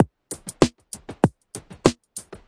SimpleBeat97bpmVar3
This is a very basic beat which has some kind of nice groove. I left it rather dry so you can mangle it as you please.
beat, drums, 97-bpm, groove, dry, simple, variations, drum